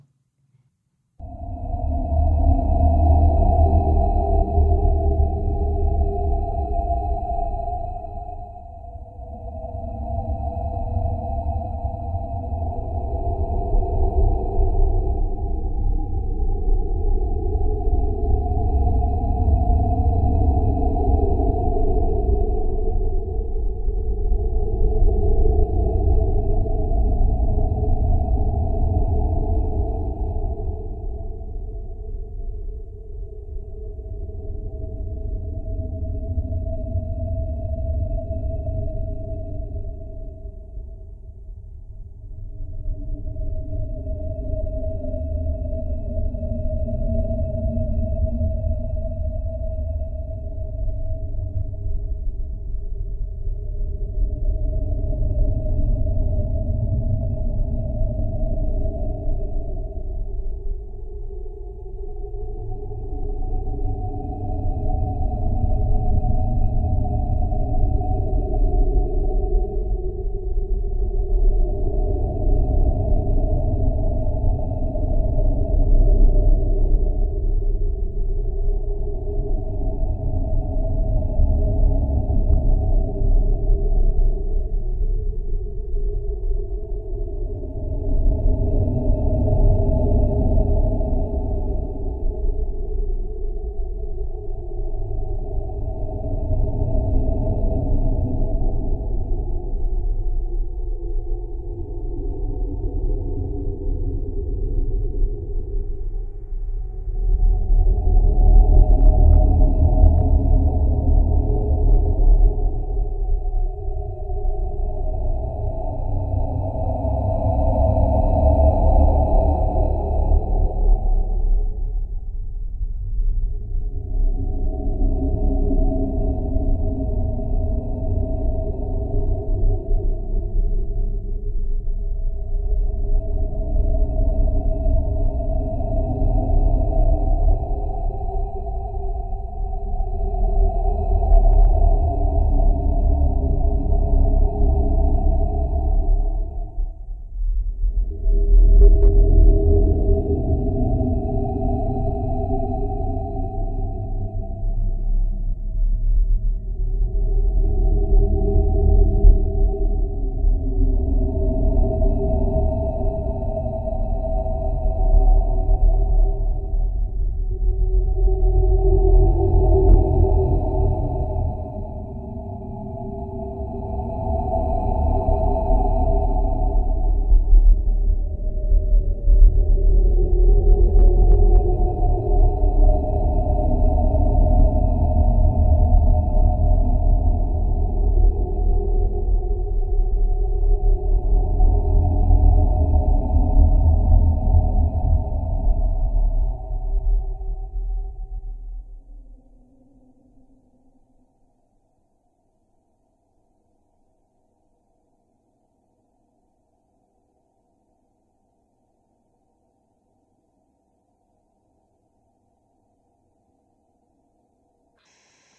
Atmospheric sound for any horror movie or soundtrack.
Terror, Atmosphere, Scary, Horror, Evil, Halloween, Freaky